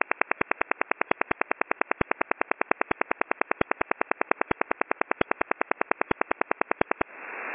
Little shortwave noises.